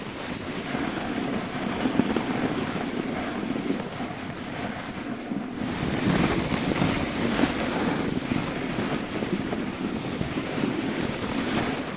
Snowboard Slide Loop Mono 03
Snowboard - Loop.
Other Snowboard loops:
Gear: Tascam DR-05.
snowboard, sliding, slide, field-recording, winter-sport, snow, winter, glide, ice, gliding, loop